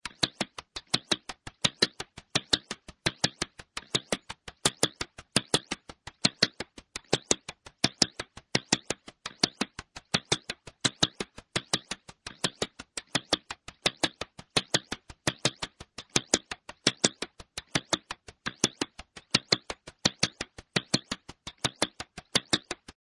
NICE BEAT 1
electronic drum beat
electronic, drum, beat